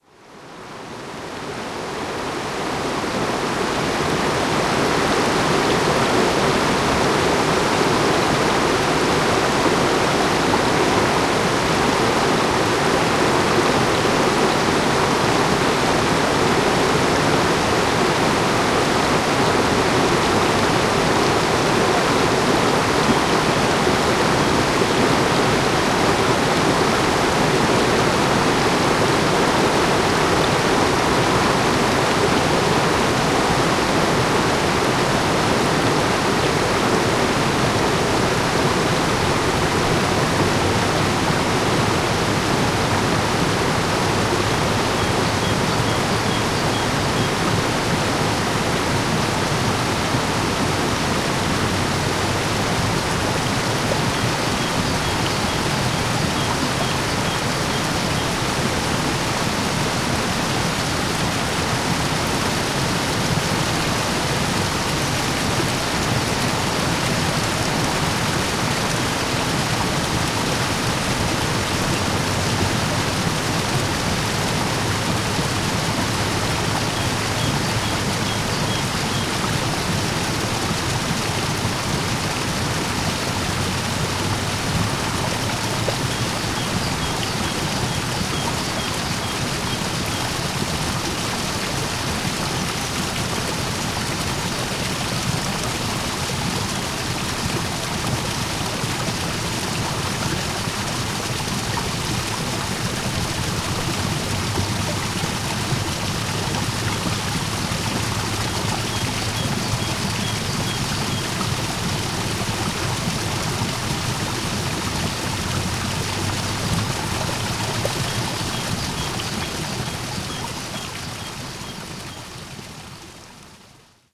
03.River-Nevis-Rapids
Sound of the waterfall and rapids on river Nevis.
waterfall, river, water